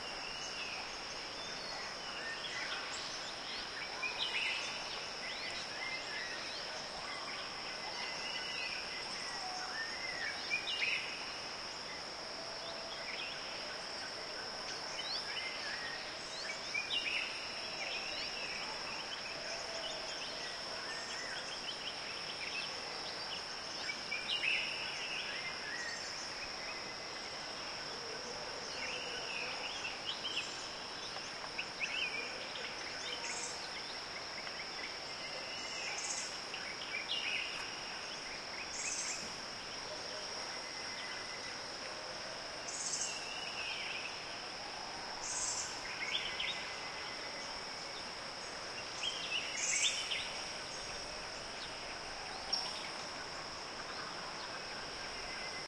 Thailand jungle calm morning with birds and crickets echo natural
birds, calm, crickets, field-recording, jungle, morning, Thailand